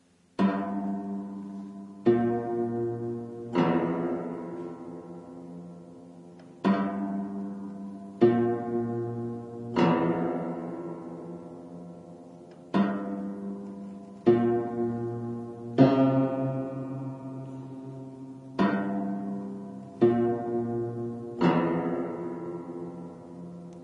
piano false 4
old dissonant piano recorded in decaying castle in Czech republic
false, piano, castle, old, dissonance